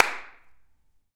Pack of 17 handclaps. In full stereo.
clap, natural